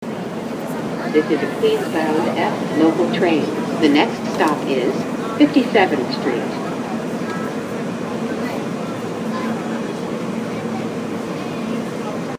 NYC Metro sounds